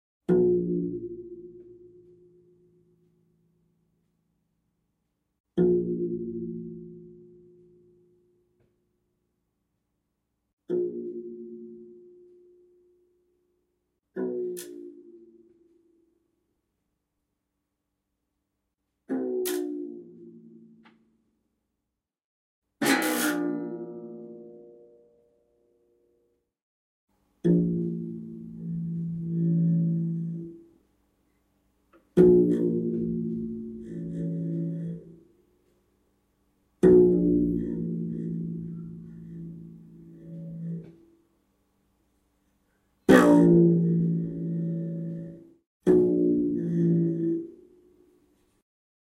Plasticoustic - Bass Twang
Plucking metal bass string of plastic acoustic guitar. Loose tuning and heaving plucking, gives it a pitch bend.
bass, acoustic, guitar, string, plastic